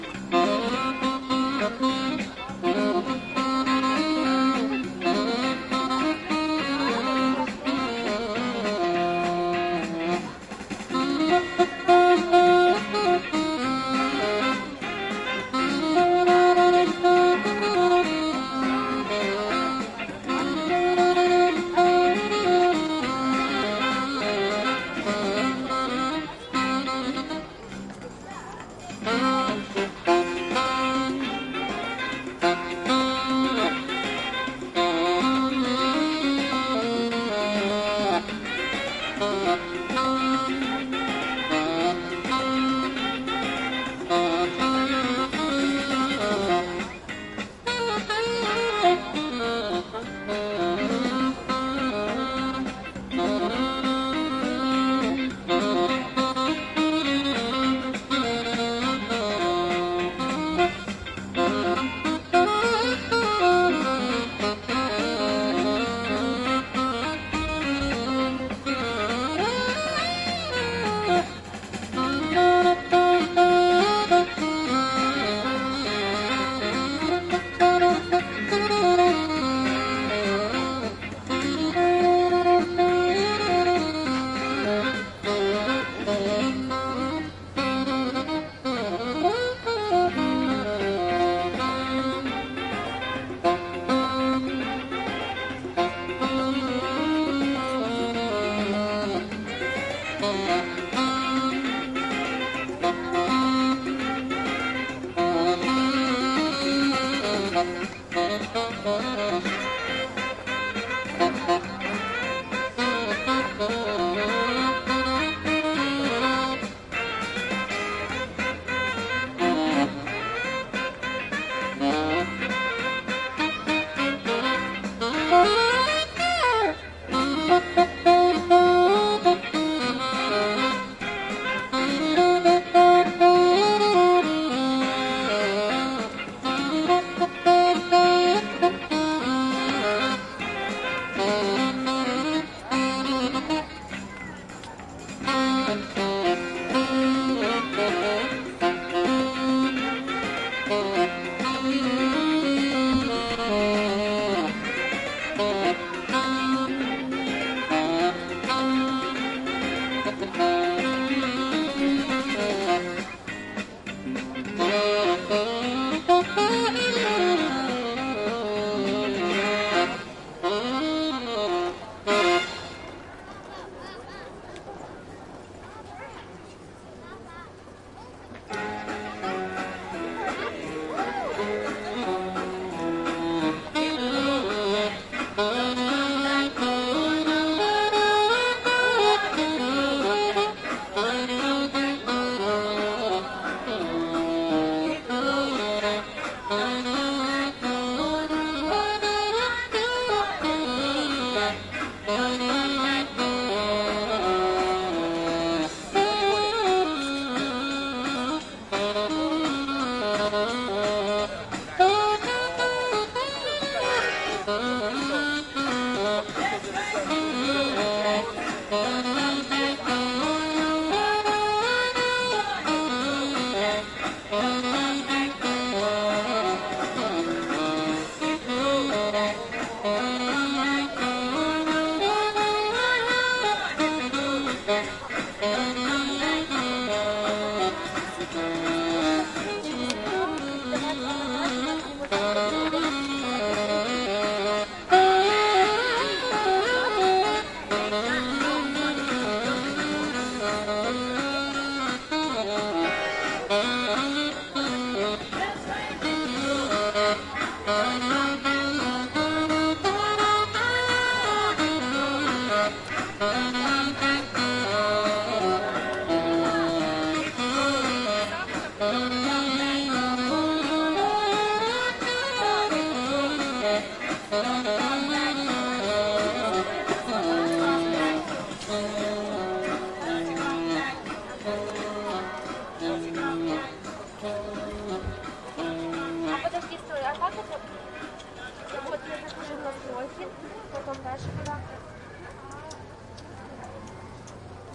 Sax player plays mixture of hit songs at the riverfront of Astana city, Kazakhstan, Jul 16, Roland R-26's XY mics